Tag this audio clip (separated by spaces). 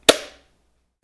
home mounted switch